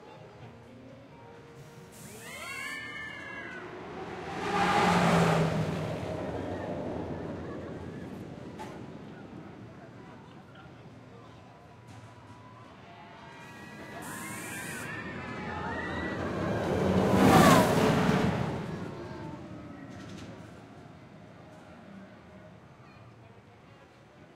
Coaster launch 2
The sound of a roller coaster launch.
Achterbahn, coaster, environment, park, roller, themepark, thrill